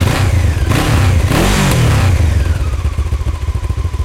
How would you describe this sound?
Honda CBF 500 little longer roar sound
Recorded with Tascam DR-40 in X-Y mode. Roaring Honda CBF 500 engine. With some metallic sound from the muffler. Othe take.
rev, motorcycle, engine, honda, roar, moto